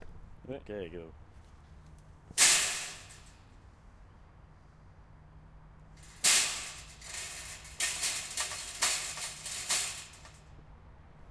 Fence Rattle 1 Front
Rattling a section of chain link fence.
Link, Fence, Chain, Rattle, Shake